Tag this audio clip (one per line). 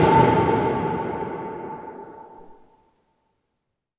metallic
drum
metal
percussion
field-recording
hit